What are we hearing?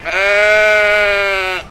Recorded at the Great Yorkshire Show using a Sony PCM-D50.